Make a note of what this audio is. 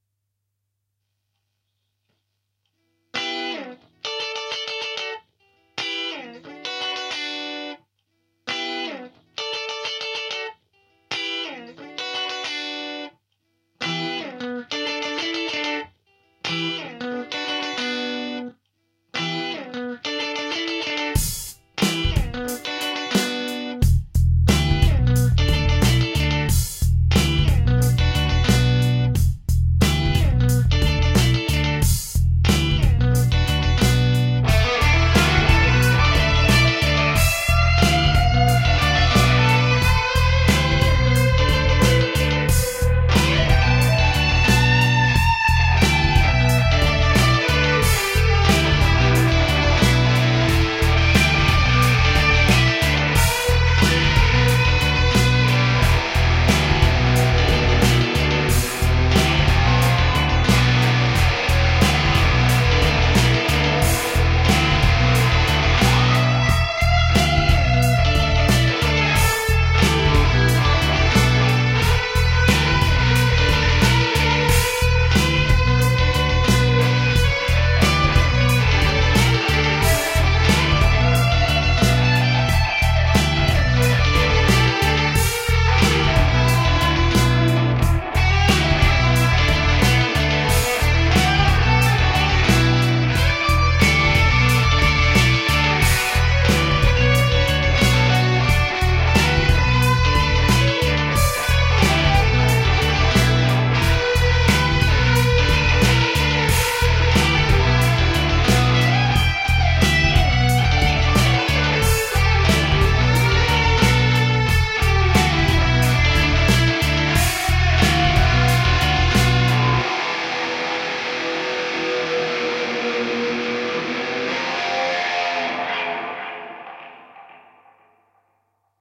Funk-Rock-Improvisation
It`s a simple music experiment with AbletonLive where I playing on my custom electric-guitar some funk rhythm-chords and power distortion solo. Using drum samples and creating a bass party on my keyboard. Enjoy.
Key - Em.
Tempo - 90 bpm.
abletonlive, experimental, improvisation, improvised, instrumental, live, rock, solo